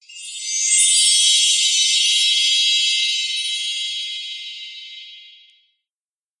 Fantasy SFX 005
high sparkly sound like fairy dust...
fairy, spell, airy, sparkle, chimes, downwards, magic